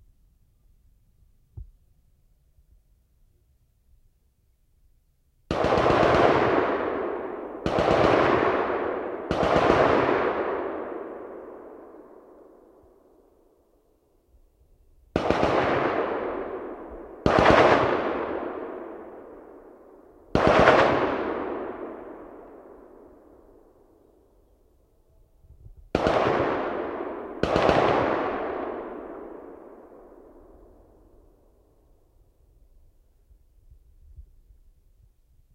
Maxim Russian machinegun distant
Maxim, russian 2nd world war powerful machine gun, distant, distance to gun about 200 meters. Recorded with H4N 4 track mode, with MKH60 and internal mics for delay and reverb.
authentical, gun, guns, II, Machinegun, russian, war, world, WW, WWII